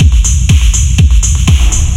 Industrial house loop (2) 122 bpm
dark, drums, house, Industrial, loop